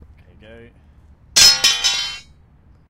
Crowbar Drop 2 Rear
Dropping a heavy metal object to replicate the sound of a crowbar being dropped.